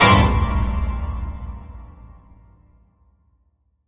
Classic piano jump scare stinger created literally by smacking my midi controller while using Kontakt Player. Added reverb.